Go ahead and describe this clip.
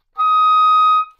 Part of the Good-sounds dataset of monophonic instrumental sounds.
instrument::oboe
note::D#
octave::6
midi note::75
good-sounds-id::8018